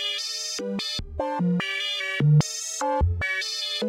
sound of my yamaha CS40M -